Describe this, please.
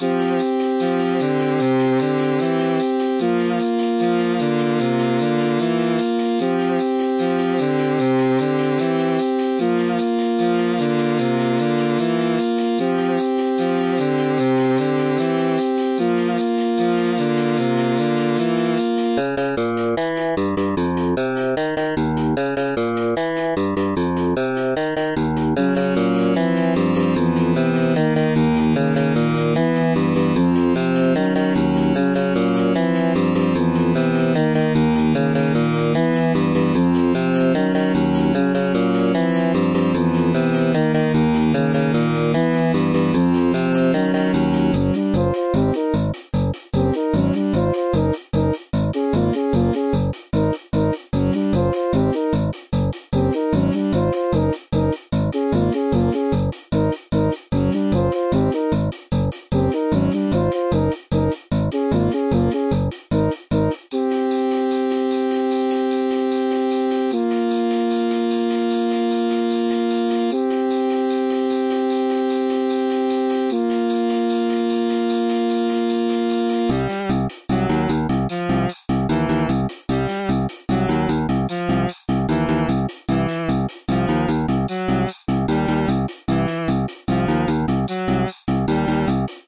Friendly disease
arcade, animation, melody, retro, background, funny, 16bit, atmosphere, music, ambient, soundtrack, ambience, loop, cartoon